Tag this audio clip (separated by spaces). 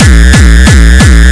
hard hardcore drum kick-drum extreme xKicks gabber kick single-hit bass beat obscure distortion jumpstyle dirty hardstyle distorted noisy